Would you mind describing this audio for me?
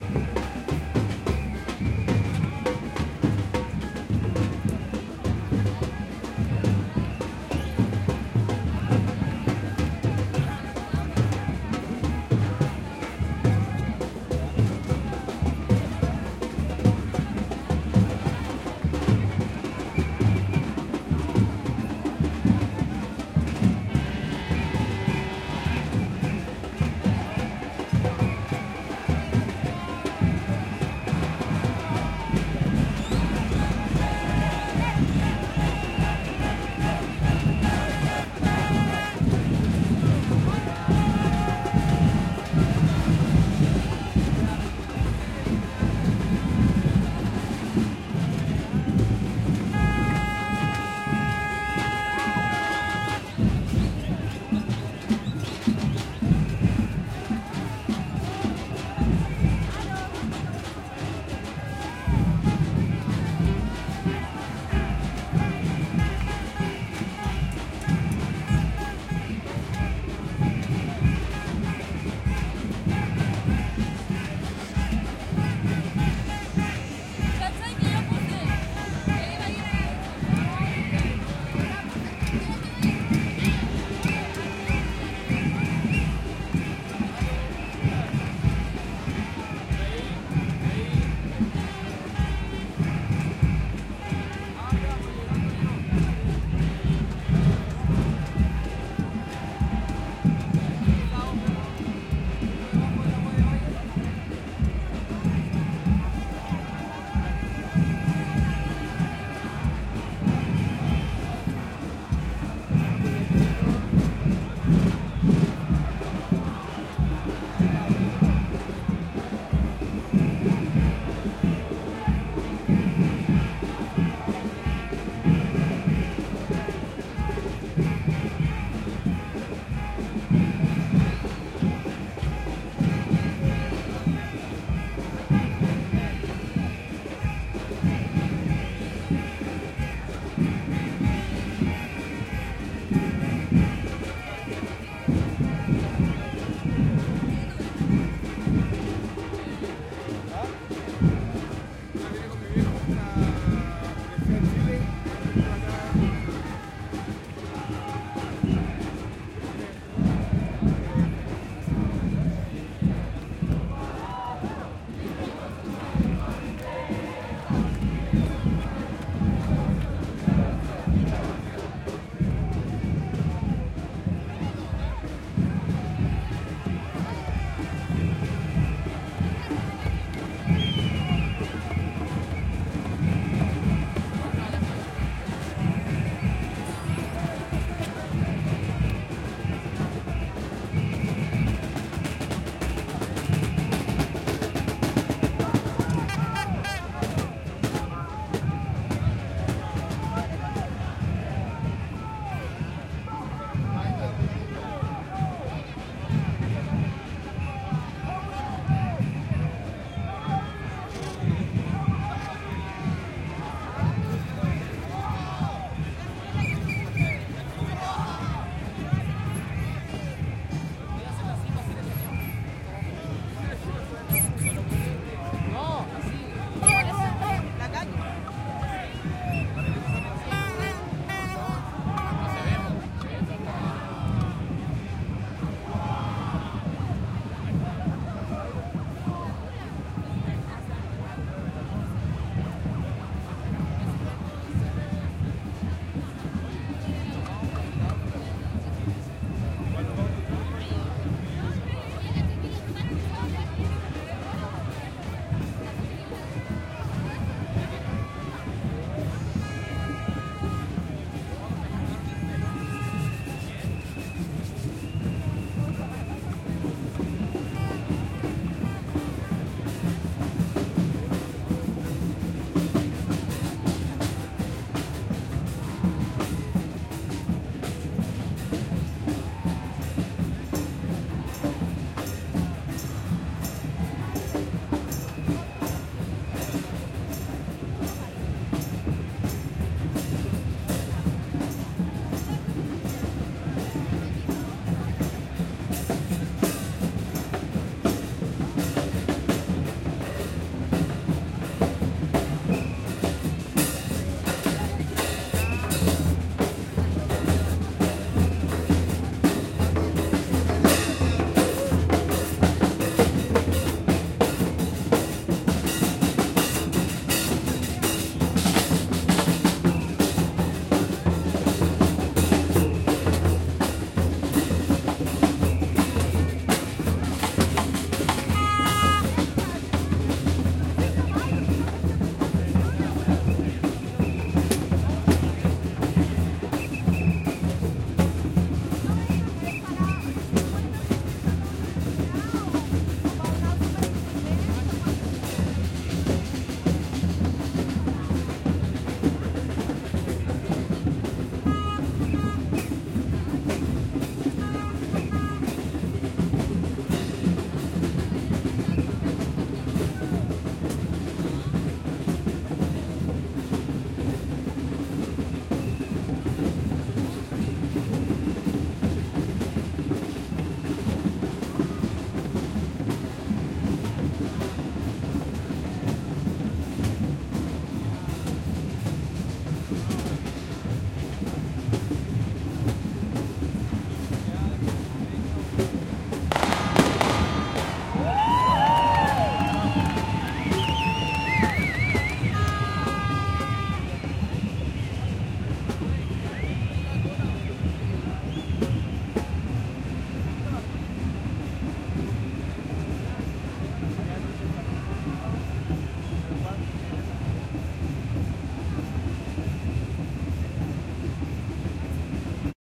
batucada cercana
trompetas
canto por el león
1..2..3.. educación! educación!